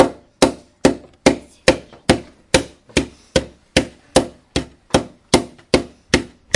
Mysounds HCP Evan panpipes
This is one of the sounds producted by our class with objects of everyday life.
France, Mysounds, Pac, Theciyrings